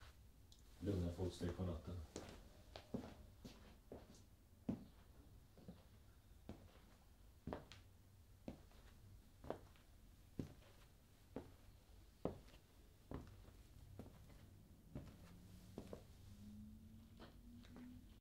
Walking quietly with rubber shoes on a hard floor.